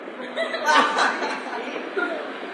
voc.laughing
short burst of laugh from a young girl and a boy / corto estallido de risa de una chica y un chico